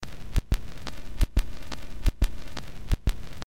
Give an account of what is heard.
hiss of a needle on an old record